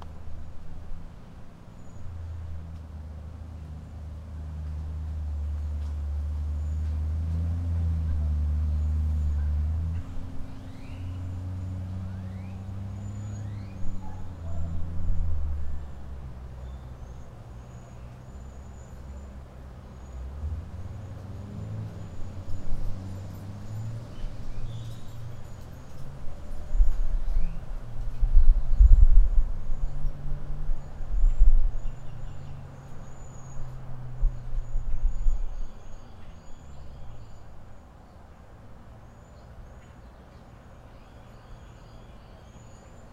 austin ambience01
Outdoor ambience in Austin, TX
atmosphere, ambient, ambience, background, field-recording, outdoor